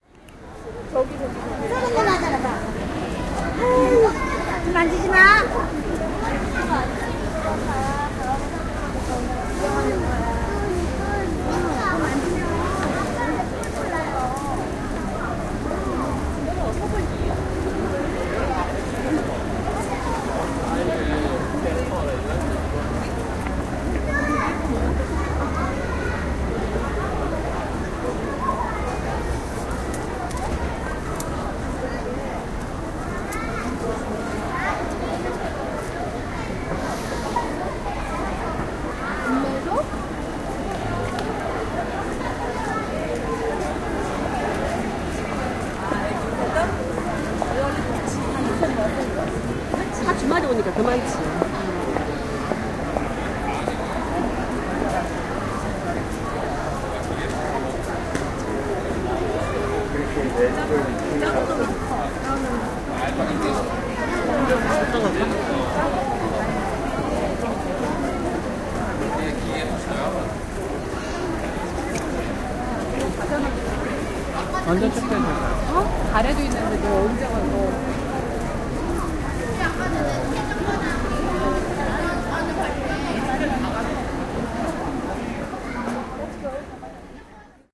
street sounds Seoul
Recorded while walking through the streets in Seoul, South Korea
Korean, Seoul, street, voices